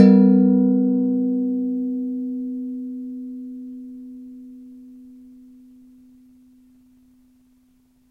Topf 02 Pott
Strike on massive big kitchen pot (steel)
Kitchen, Pot, Metall, Percussion